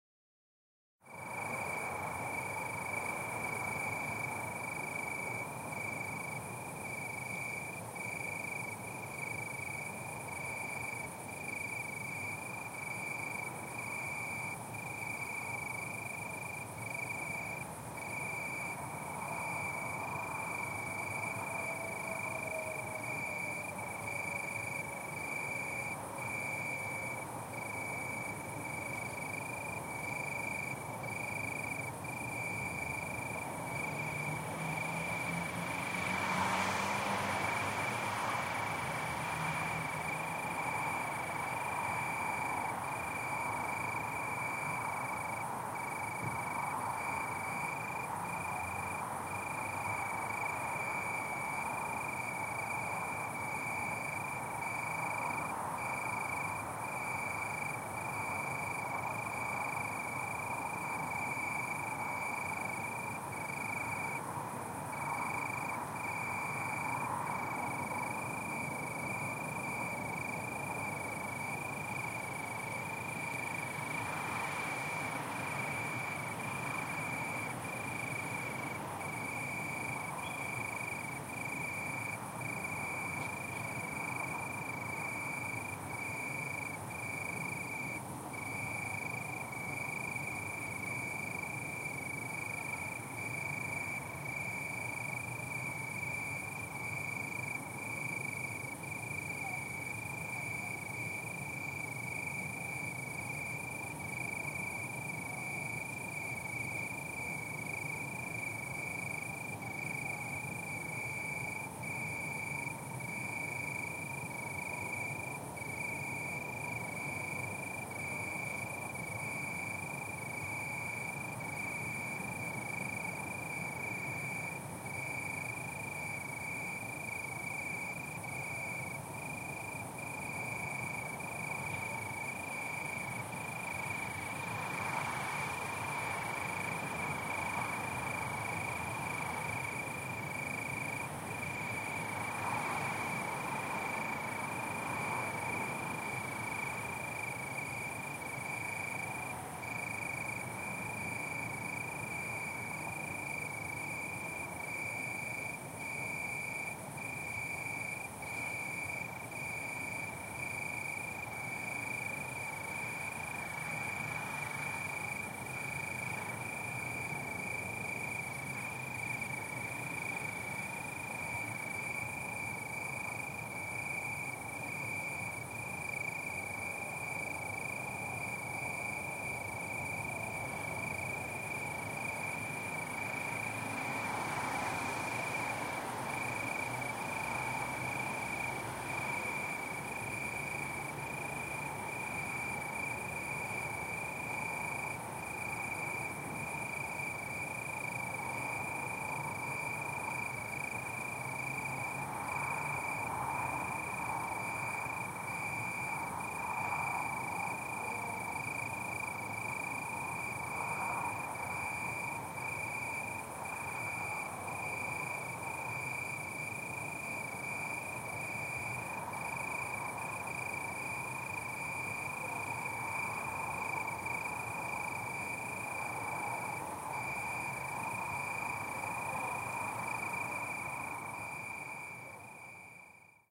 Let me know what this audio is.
Cricket in bush 1
Recording of the Prague ambiance in the evening.
Recorded at night in august, on the Prague periphery. Crickets, cars, trams in distance, voices.
Recroded with Sony stereo mic on HI-MD
amb, city, cricket, evening, night, prague, praha